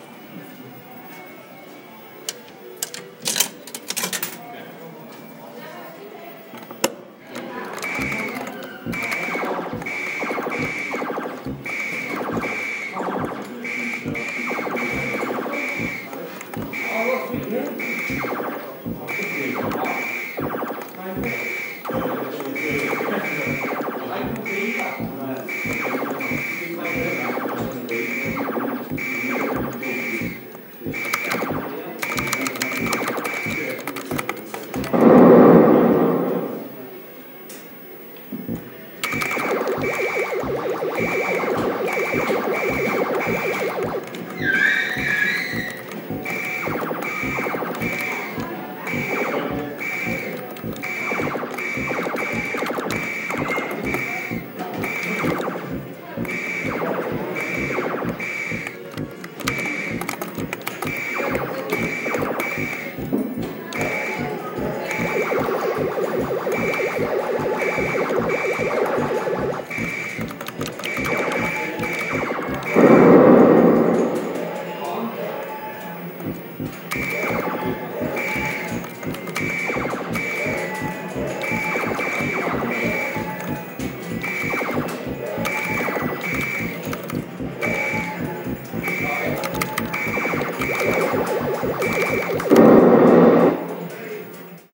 Sounds of Space Invaders arcade game.
UPD: changed the name, it was previously called Galaxian by a mistake
game, sound-sound, buttons, arcade, joystick